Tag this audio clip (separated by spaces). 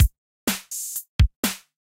Chillbeat Cool HipHop